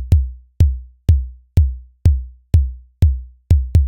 track12 kick
part of kicks set
electronica, kick, drum